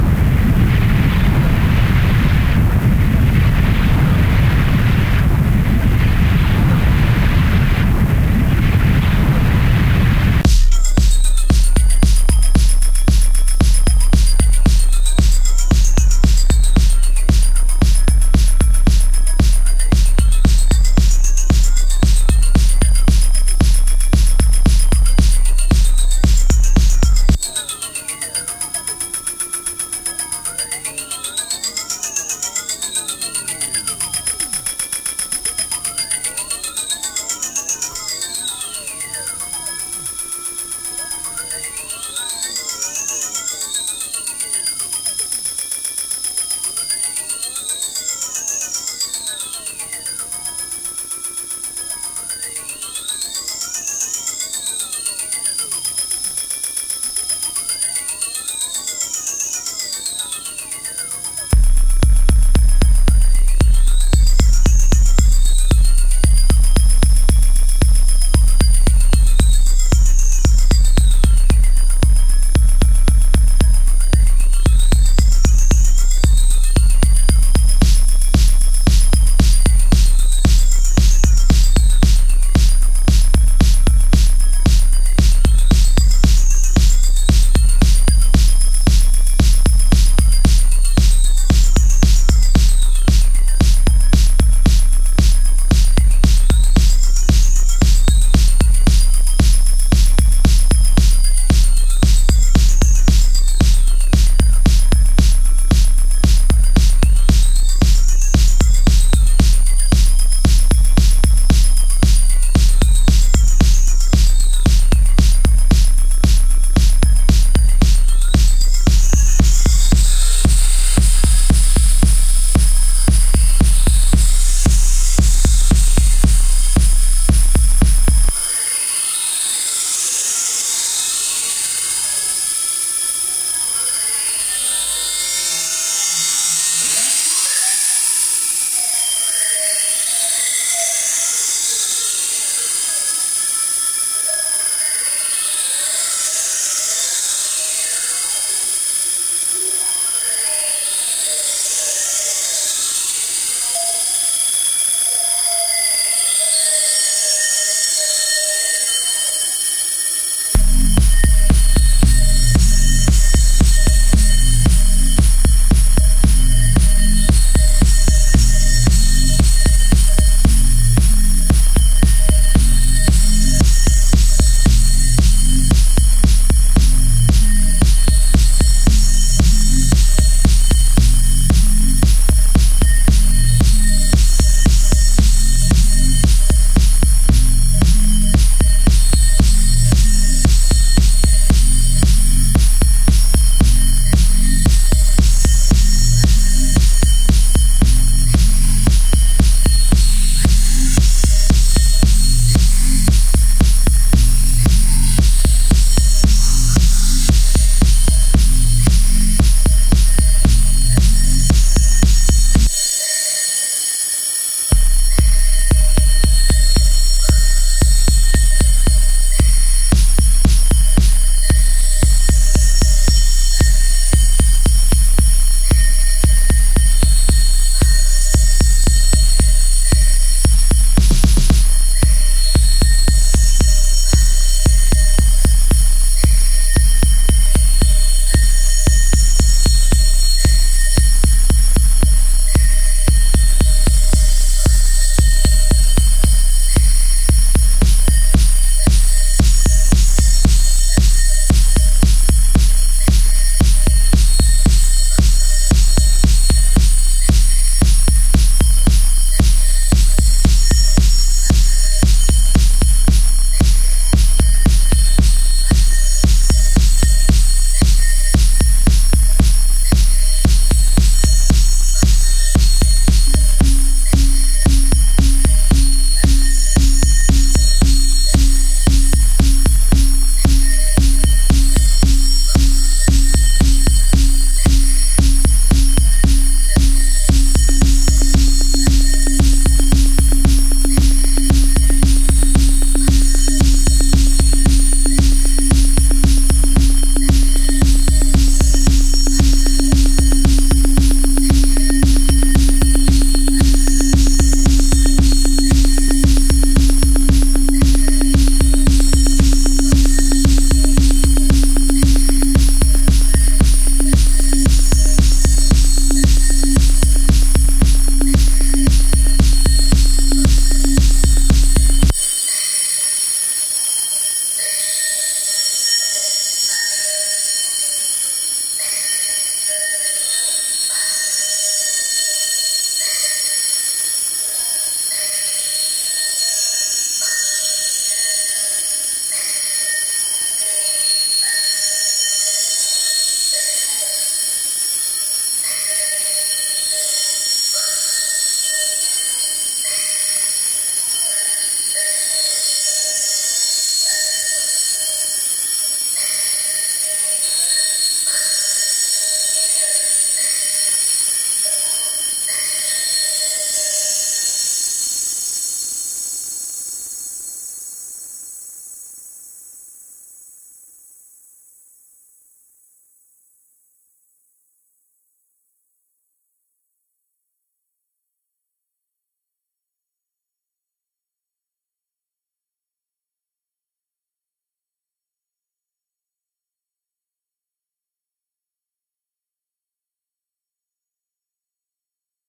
Random Techno Beat
I, made, Random, sampling, song